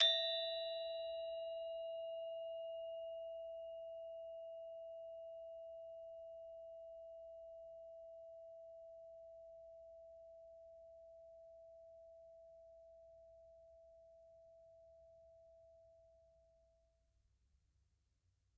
Bwana Kumala Gangsa Pemadé 12
University of North Texas Gamelan Bwana Kumala Pemadé recording 12. Recorded in 2006.